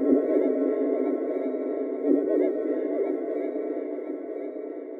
semiq fx 9.
abstract, bleep, digital, effect, electric, electronic, freaky, future, fx, glitch, lo-fi, loop, machine, noise, piano, sci-fi, sfx, sound, sound-design, sounddesign, soundeffect, soundesign, strange, synth, weird